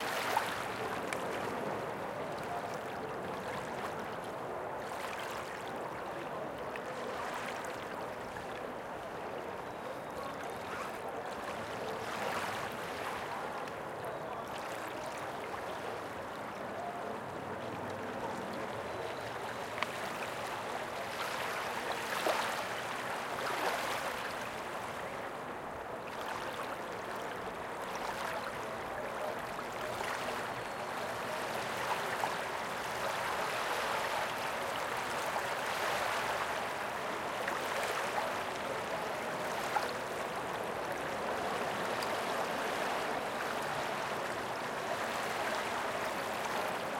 River in a city (Rhine, Duesseldorf), close recording
At the beach of the river Rhine in Duesseldorf, Germany. Some background noise of the city.
beach, field-recording, flow, river, splash, water, waves